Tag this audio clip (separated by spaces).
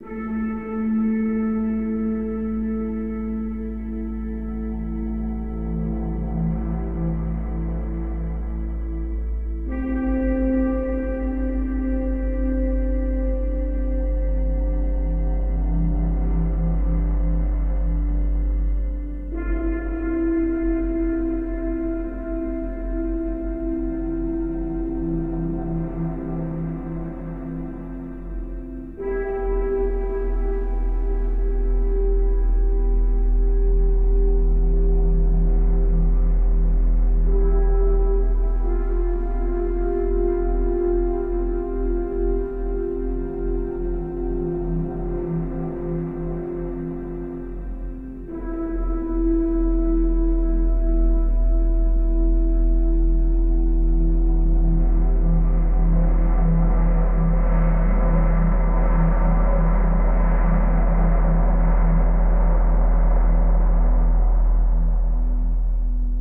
ambient atmo space